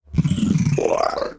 Sounds like an alien transmission from space. This is an example of digital signal processing since this was created from recordings of random household objects in a studio.